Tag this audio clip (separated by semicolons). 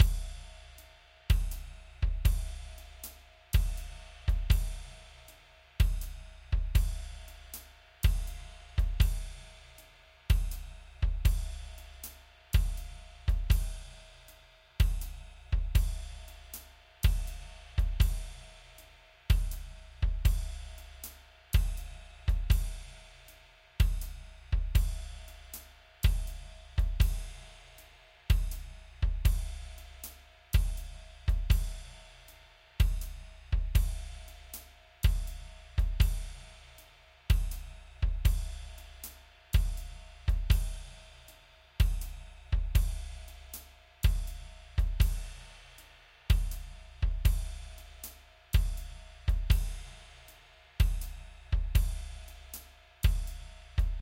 HearHear; Drums; Do; beat; Chord; rythm; blues; bpm; loop; 80